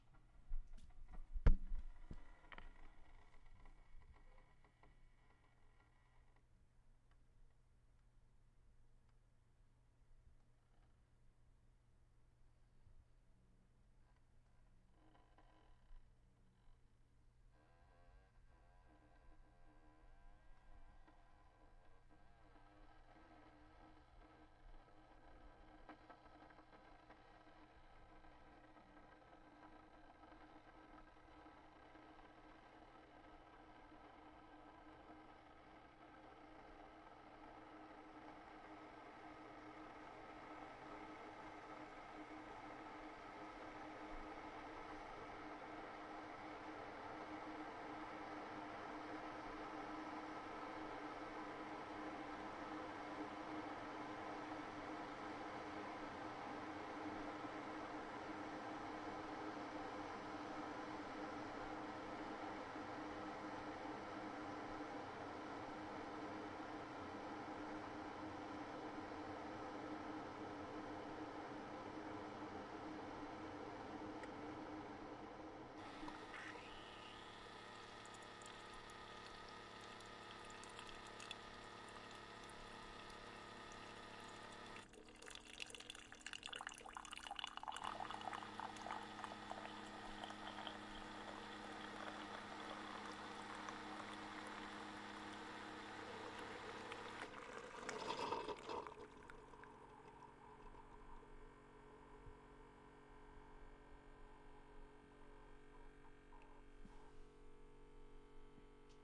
Keurig Making Coffee - This is the sound of a Keurig making coffee.
maker, coffee, hot